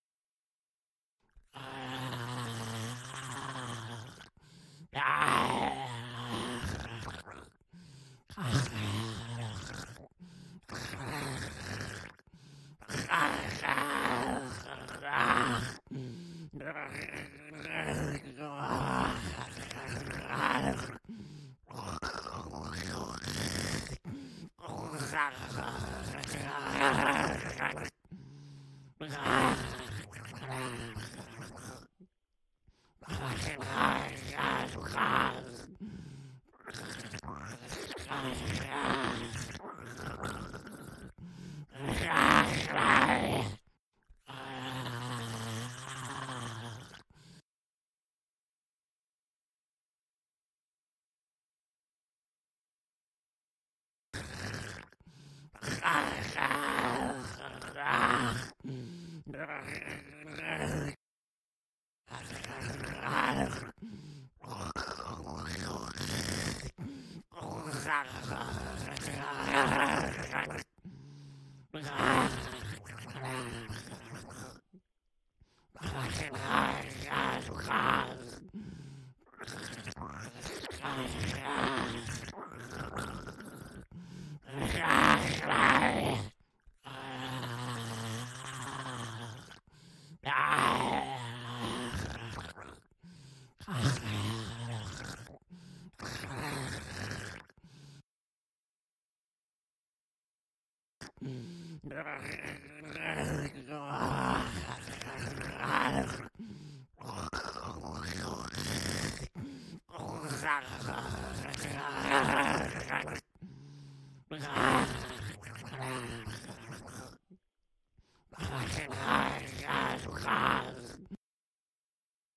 Single groaning zombie. Syncs at 08.24.14.